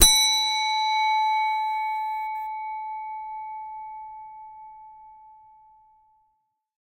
Brass reception bell.
ding, reception, small